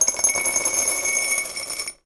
A lot of dice (different sizes) being dumped into a ceramic container (again).
dice, ceramic, dump